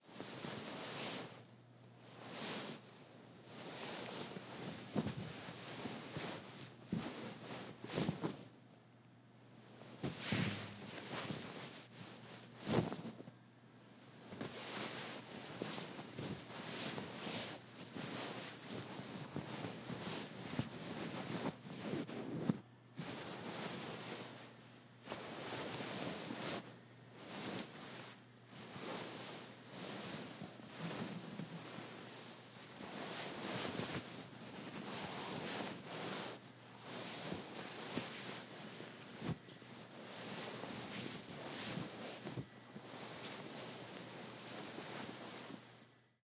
Thick Bed Cover Sheet Blanket Ruffle (16-44.1)
Just me ruffling a comforter on a bed. Recorded with an Aphex 207D and a Rode NT2.